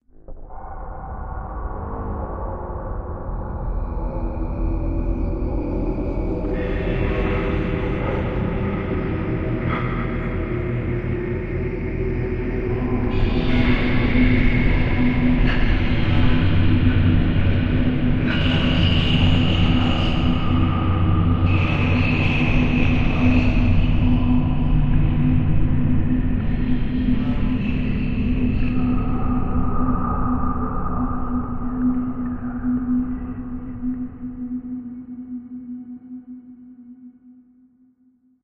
Horror Soundscape 1
Game, Drone, Horror, Dark, Atmosphere, Soundscape, Song, Scary, Creepy, Spooky, Cinematic, Ambient